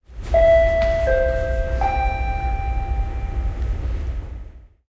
Signaali, äänimerkki, kolmiosainen / Signal, three-part, before an announcement or a call at a small railwaystation (Joensuu)
Kuulutusta edeltävä kolmiosainen äänimerkki pienellä rautatieasemalla (Joensuu).
Paikka/Place: Suomi / Finland / Joensuu
Aika/Date: 22.03.1987
Kuulutus, Station, Railway, Yle, Railwaystation, Asema, Finnish-Broadcasting-Company, Finland, Merkki, Signaali, Tehosteet, Yleisradio, Suomi, Field-Recording, Announcement, Signal, Soundfx, Rautatieasema